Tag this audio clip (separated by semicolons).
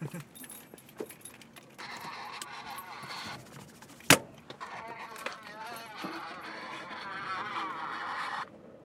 talkie; walkie